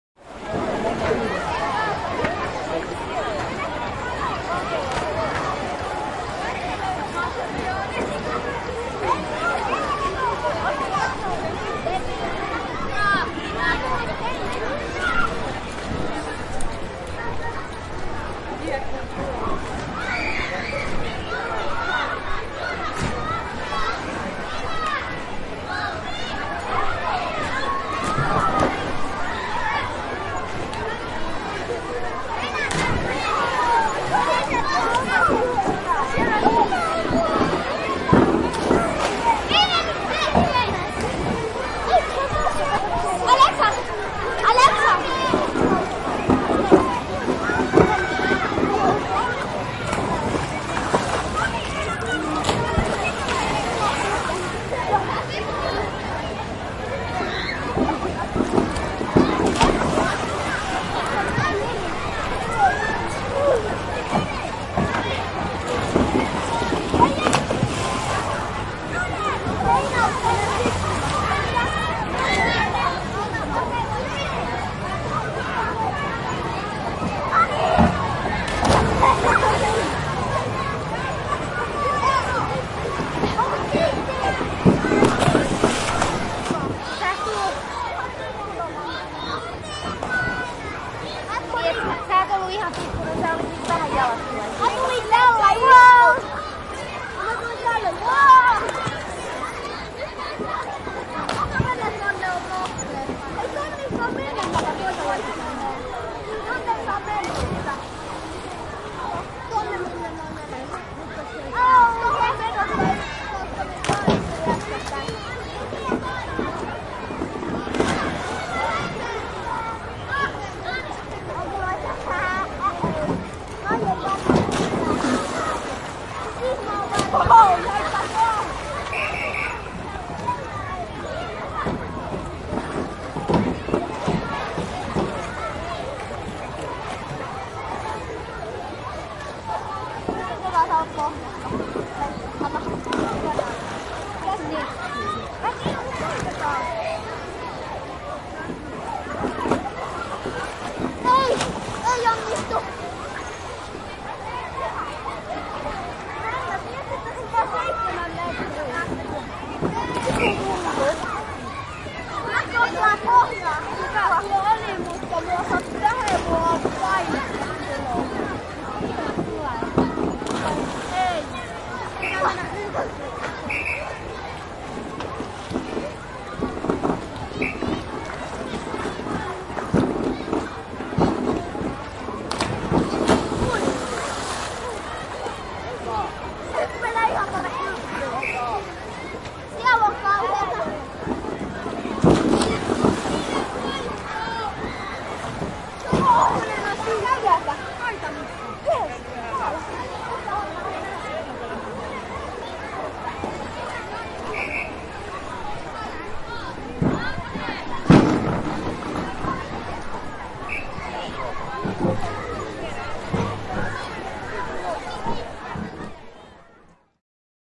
Uimastadion, lapsia uimassa, maauimala / An outdoor swimming pool, children swimming and shouting
Paljon lapsia uimassa ja hyppimässä ponnahduslaudalta, meteliä, huutoa, loiskahduksia, valvojan pilli välillä, vilkas. Kesä.
An outdoor swimming pool, lido, children swimming and jumping in the water, diving board, shouting, splashes, warden's whistle.
Paikka/Place: Suomi / Finland / Helsinki
Aika/Date: 03.07.1995
Children, Field-Recording, Finland, Finnish-Broadcasting-Company, Huuto, Lapset, Lido, Loiske, Maauimala, Shouting, Soundfx, Splash, Summer, Suomi, Swimming, Tehosteet, Uida, Uimala, Vesi, Water, Yle, Yleisradio